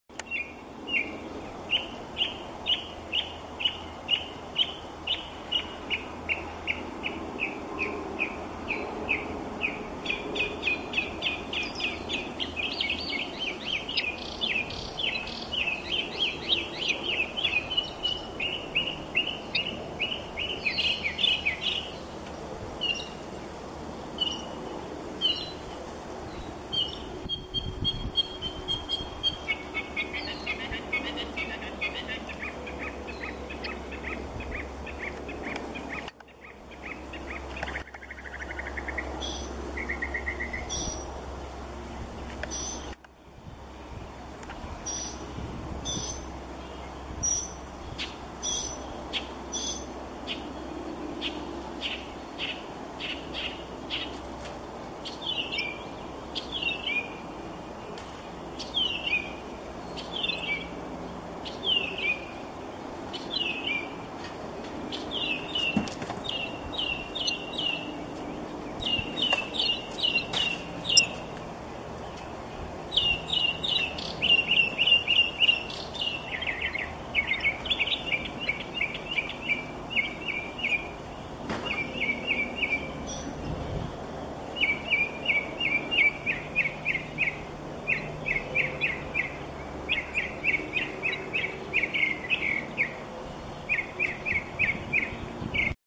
Mocking Bird singing on Pole Outside Our Home
Crazy, Chirping, Sounds, Bird, Mocking